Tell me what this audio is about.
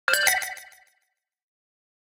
Retro Game Sounds SFX 45
Shoot, Sounds, effect, fx, gameaudio, gamesound, pickup, sfx, shooting, sound, sounddesign, soundeffect